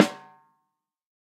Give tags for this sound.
snare artwood multi sample drum tama 14x8 velocity custom shure sm7b

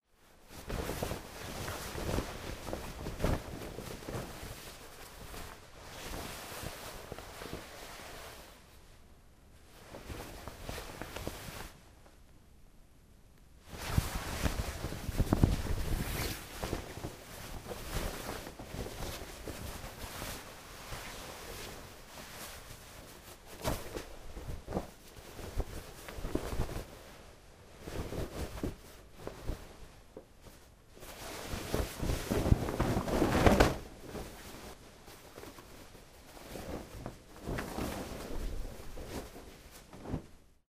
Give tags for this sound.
fabric sheets flag sheet cloth rustle clothes rustling

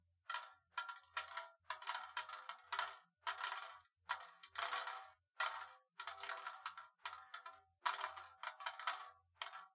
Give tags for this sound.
interactions recording player

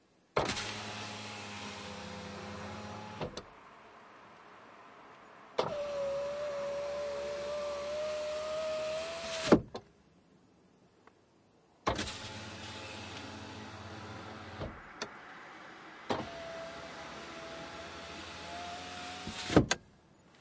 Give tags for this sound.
car mechanical window

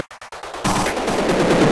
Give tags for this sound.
experimental noise broken industrial glitchy

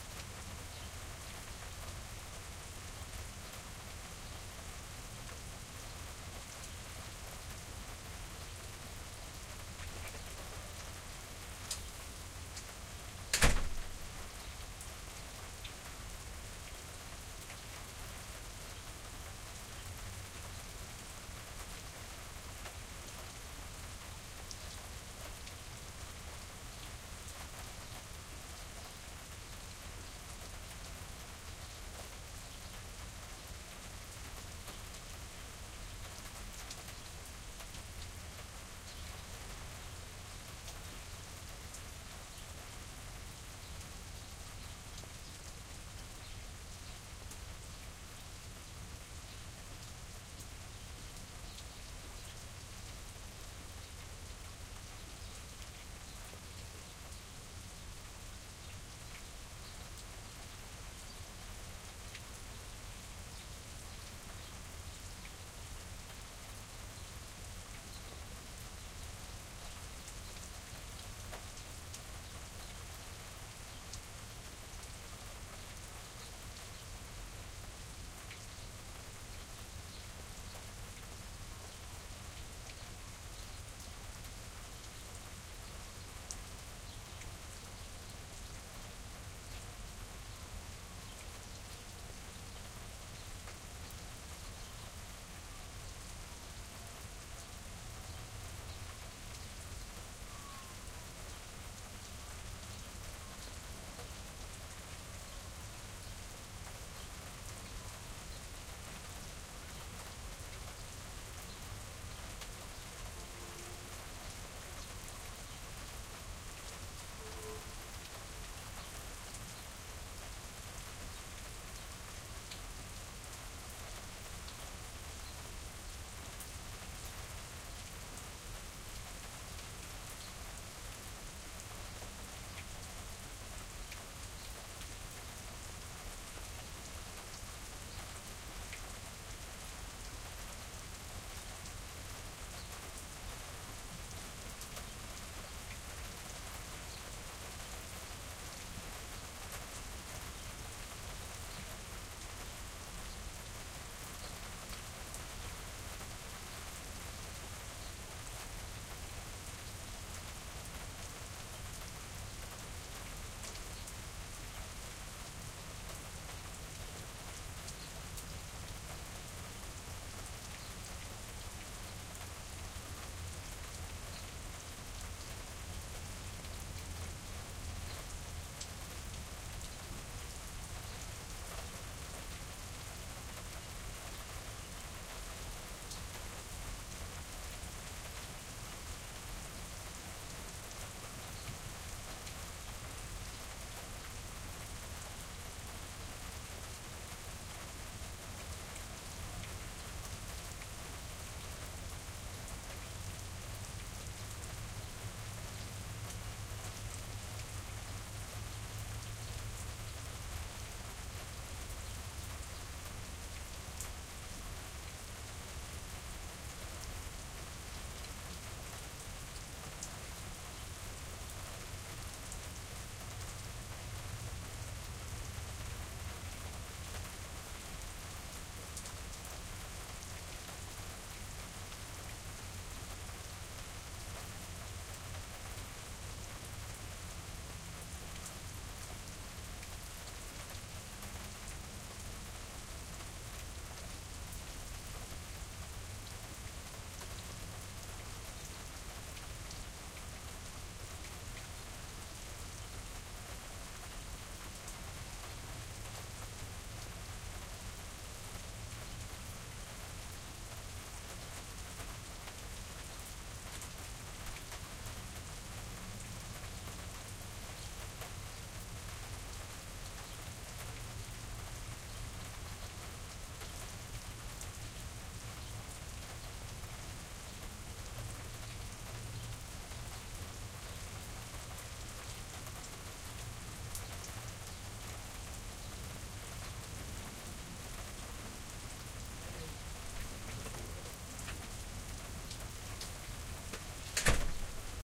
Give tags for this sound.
atmosphere field-recording outdoor

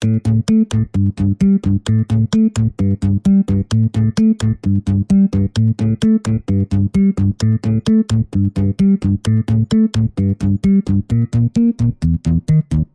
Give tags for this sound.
vlog-music music-loops free-music-to-use download-free-music music loops free-vlogging-music vlog music-for-videos prism electronic-music free-music background-music vlogging-music music-for-vlog audio-library vlogger-music download-background-music download-music syntheticbiocybertechnology sbt free-music-download

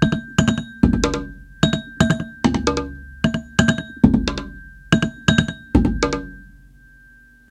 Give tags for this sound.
composition drum fragments music toolbox

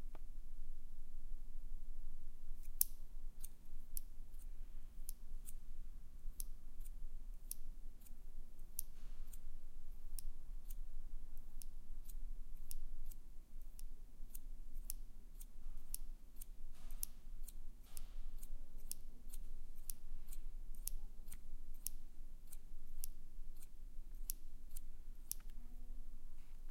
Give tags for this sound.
domestic-sounds scissors recording

